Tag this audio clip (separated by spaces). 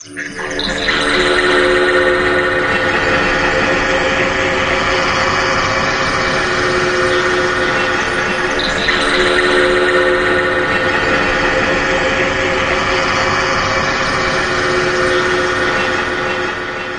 ambient dark dynamic horror space